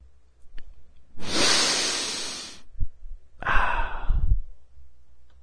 Cheap mic used with laptop snd card to record a clip for a church video.
human, breath, deep, inhale